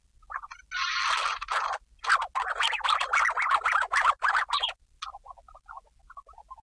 Sounds like a record scratch. Taken from about five minutes of noise, made by holding multiple buttons on a stereo's tape player.J'aime des cassettes de bande ! Refroidissez ainsi les bruits qui les font !
cassette, distorted, turntables